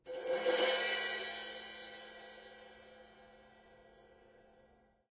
CYMBAL crescendo0POINT7secpeakMS
Mid/Side Crashes, Variation #4
drums, percussion, crash-cymbal, stereo, crescendo, DD2012, crash, 1-shot, mid-side